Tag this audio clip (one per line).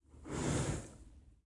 recording
study